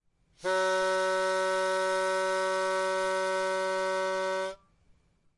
Guitar Pitch Pipe, F-sharp2
Raw audio of a single note from a guitar pitch pipe. Some of the notes have been re-pitched in order to complete a full 2 octaves of samples.
An example of how you might credit is by putting this in the description/credits:
The sound was recorded using a "H1 Zoom V2 recorder" on 17th September 2016.
G-flat, guitar, F-sharp, pipe, sampler, pitch, 2, instrument